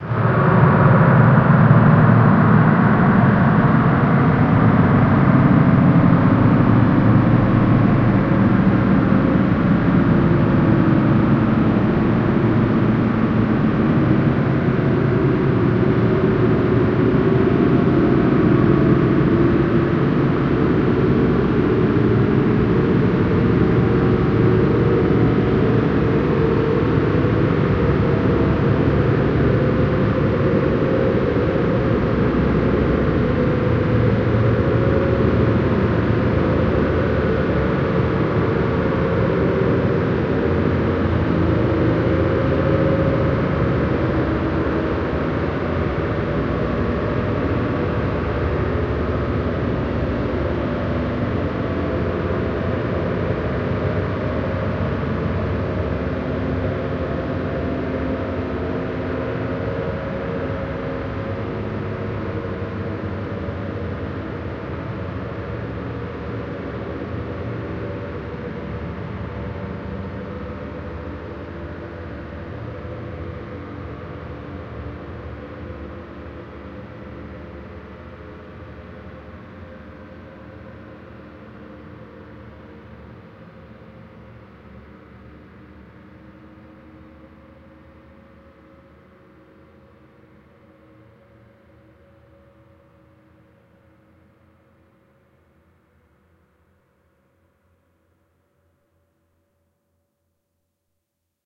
Alien Spacecraft taking off 006
Alien Spacecraft taking off
Alien, Aliens, Game-Creation, Hyperdrive, Outer, Outer-Space, SciFi, Shuttle, Space, Spaceship, Spaceshuttle, Warp